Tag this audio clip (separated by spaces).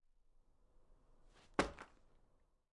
thin-carpet drop purse